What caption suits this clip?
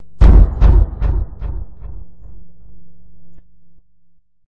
Made this by putting my keyboard through my guitar pedal, distorting the kick drum, then putting an echo on it. I made it to put in my horror film in media studies
deep, echo, drum, horror